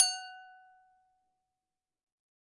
Wine Glass Hit F#5
Wine glass, tuned with water, being hit with an improvised percussion stick made from chopstick and a piece of plastic. Recorded with Olympus LS-10 (no zoom) in a small reverberating bathroom, edited in Audacity. The whole pack intended to be used as a virtual instrument.
Note F#5 (Root note C5, 440Hz).
clean, glass, hit, instrument, melodic, note, one-shot, percs, percussion, percussive, tuned, water, wine-glass